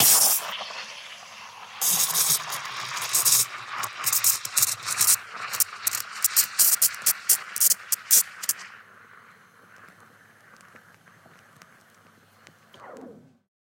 14. frothing the milk
frothing milk with an espresso machine